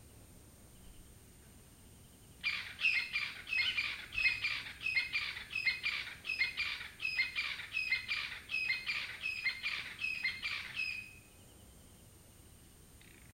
Hluhluwe11 unknown bird
Marantz PMD751, Vivanco EM35.
africa, birdcall, crested-francolin, savanna